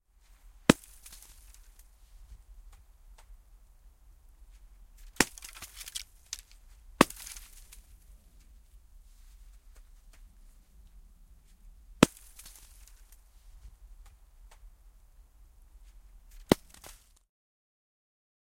Lumipallo, osuma puuhun / Snowballs hitting a tree trunk nearby, a few throws, snowball smashes
Lumipalloja heitetään, osumat puun runkoon, lumipallon hajoaminen kuuluu selvästi, lähiääni.
Paikka/Place: Suomi / Finland / Vihti, Ojakkala
Aika/Date: 29.03.1996